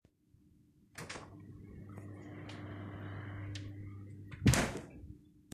Fridge open door and close.
open, fridge